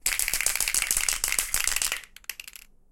Spray Can Shake 7
Various shaking and rattling noises of different lengths and speeds from a can of spray paint (which, for the record, is bright green). Pixel 6 internal mics and Voice Record Pro > Adobe Audition.
aerosol, art, can, foley, graffiti, metal, paint, plastic, rattle, shake, spray, spraycan, spray-paint, spraypaint, street-art, tag, tagging